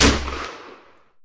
Steampunk Crossbow Shot 3
The sound of a mechanical self-made crossbow construction giving off a heavy shot.
Edited with Audacity.
Plaintext:
HTML: